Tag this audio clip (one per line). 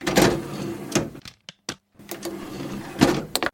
tray
disc
player
button
cd